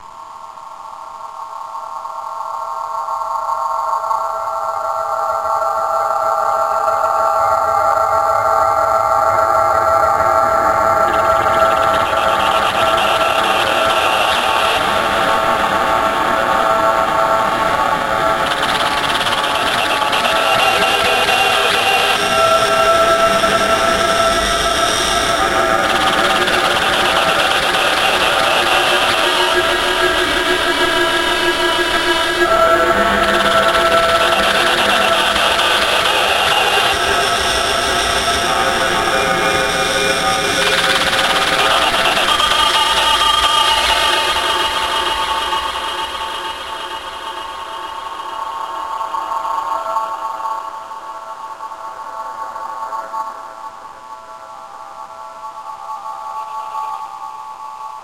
alien dial-up modem, or an alien printer
film, ambience, fx, alien, dark, filter, fear, creepy, drone, reverb, background, game, effect, ambient